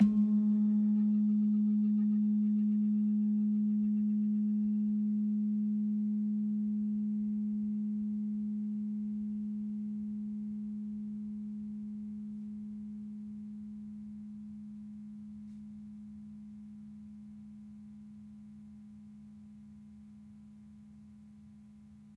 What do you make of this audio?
CASA DA MÚSICA's VIRTUAL GAMELAN
The Casa da Música's Javanese Gamelan aggregates more than 250 sounds recorded from its various parts: Bonang, Gambang, Gender, Kenong, Saron, Kethuk, Kempyang, Gongs and Drums.
This virtual Gamelan is composed by three multi-instrument sections:
a) Instruments in Pelog scale
b) Instruments in Slendro scale
c) Gongs and Drums
Instruments in the Gamelan
The Casa da Música's Javanese Gamelan is composed by different instrument families:
1. Keys
GENDER (thin bronze bars) Penerus (small)
Barung (medium) Slenthem (big)
GAMBANG (wooden bars)
SARON (thick bronze bars) Peking (small)
Barung (medium) Demung (big)
2. Gongs
Laid Gongs BONANG
Penerus (small)
Barung (medium) KENONG
KETHUK KEMPYANG
Hanged Gongs AGENG
SUWUKAN KEMPUL
3. Drums
KENDHANG KETIPUNG (small)
KENDHANG CIBLON (medium)
KENDHANG GENDHING (big)
Tuning
The Casa da Música's Javanese Gamelan has two sets, one for each scale: Pelog and Slendro.